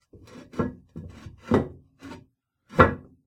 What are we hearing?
Toilet-Tank Lid Move-03
This is the sound of the lid of a toilet tank being dragged along the tank.
ceramic, drag, grind, grinding, scrape, scraping, toilet